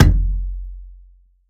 WATERKICK FOLEY - HARM 01
Bass drum made of layering the sound of finger-punching the water in bathtub and the wall of the bathtub, enhanced with harmonic sub-bass.
kick, bassdrum, percussion, foley